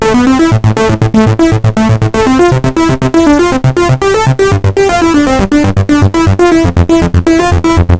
hKnobTwiddle8 Last Dance
Nord Lead 2 - 2nd Dump
blip, idm, background, rythm, resonant, bass, electro